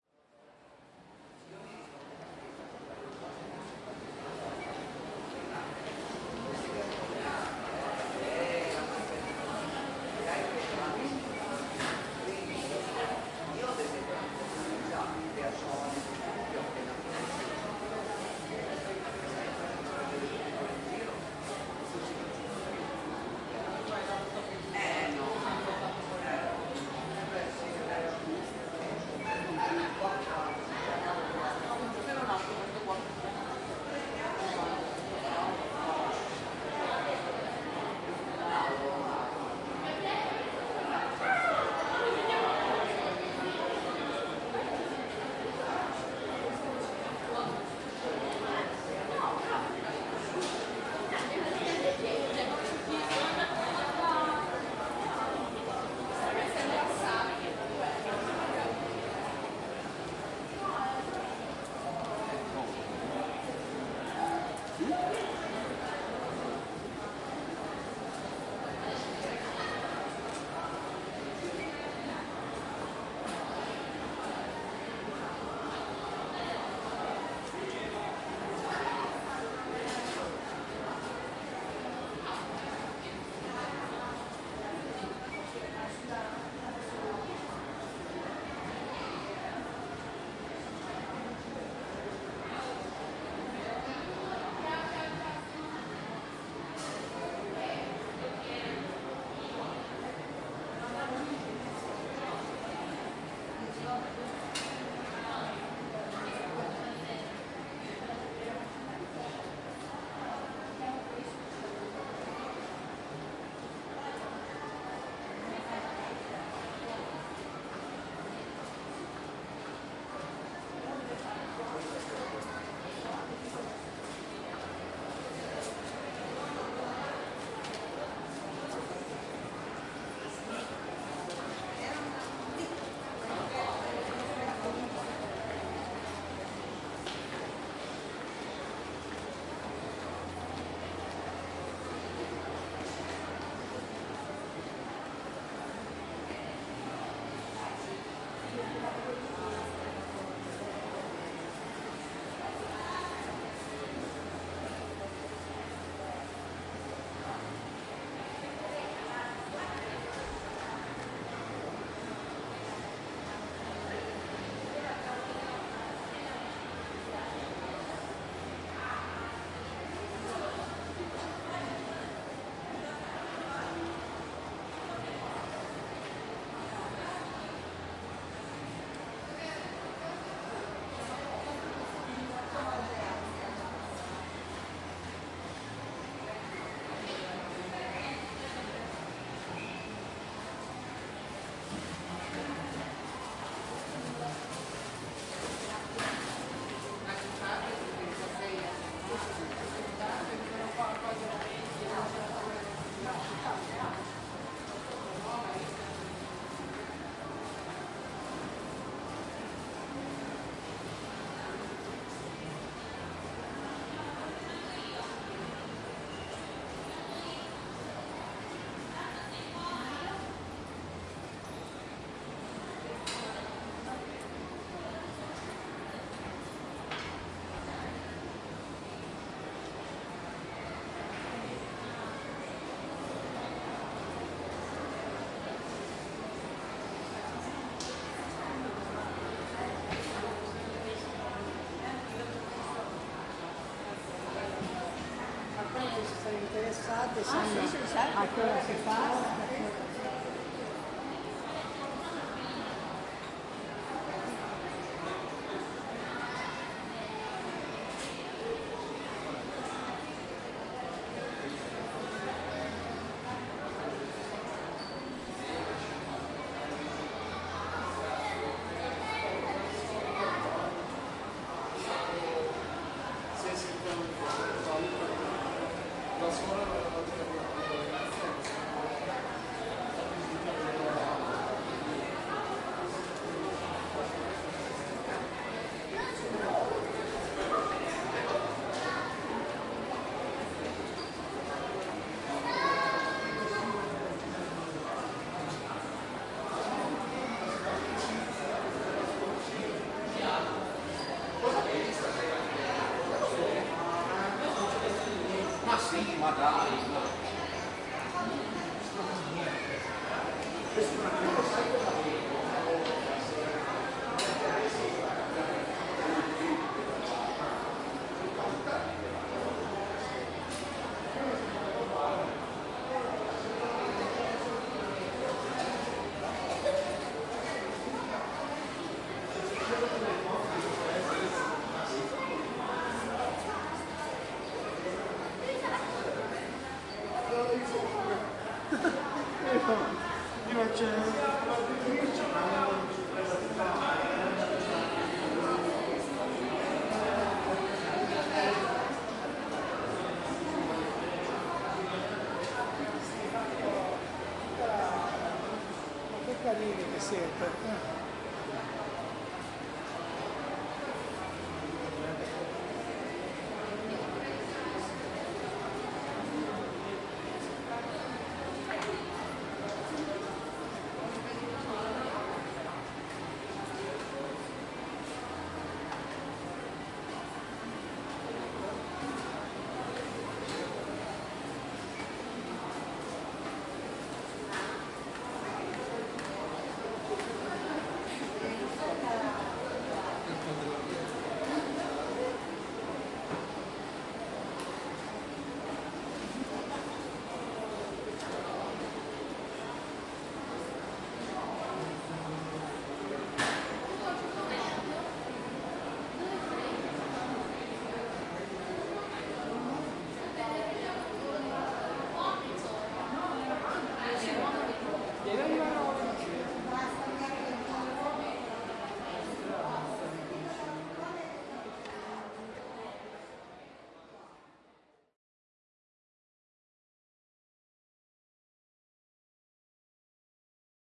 20 giugno ore 16:20, interno galleria negozi